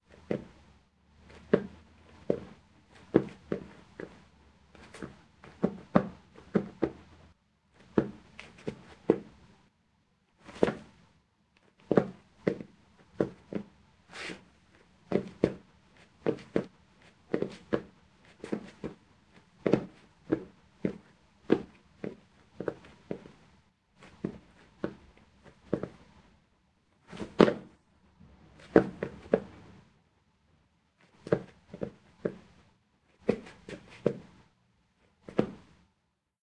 quick steps on wooden floor
steps, tap, wood